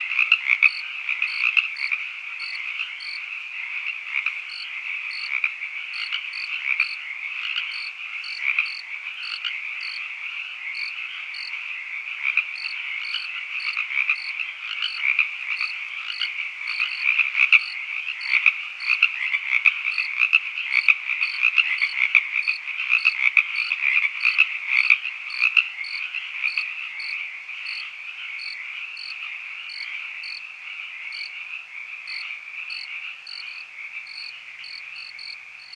Aggressively (but effectively) EQ'd to remove a nearby gas generator. This is excerpt one of two.